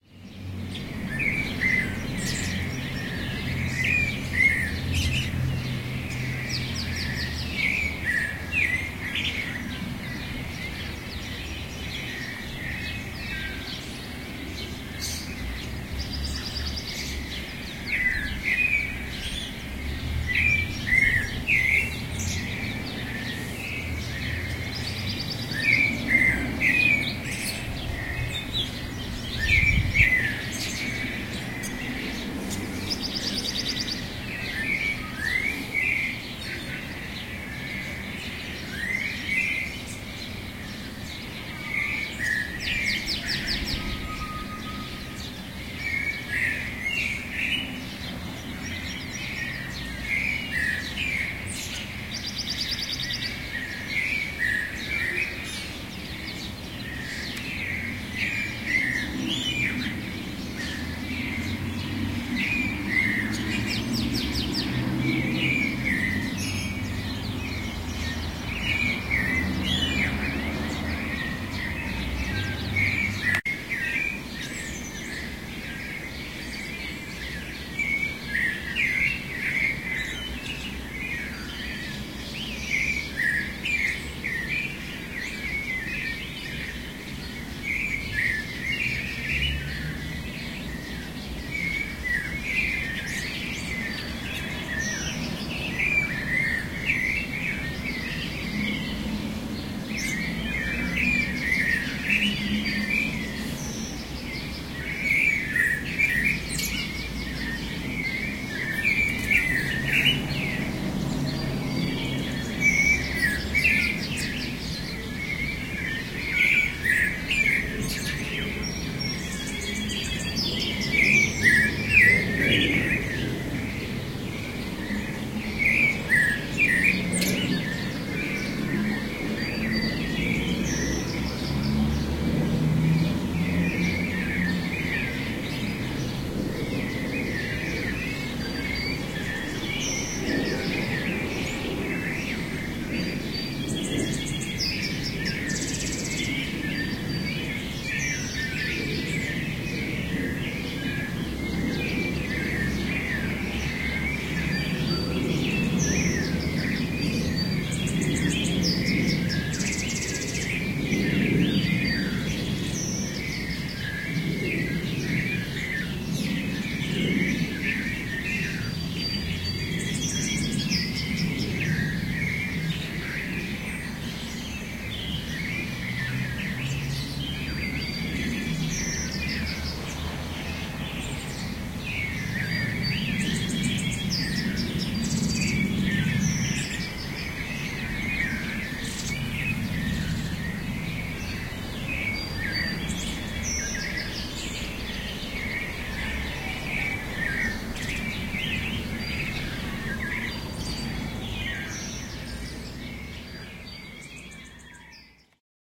Springs Birds Mexico City Feb 2013
Bird Springs in the City of Mexico, Recorded with my Tascam DR-5
February-2013-spring, Nature-in-the-Asphalt-Jungle, birds, birds-in-Mexico-City-morning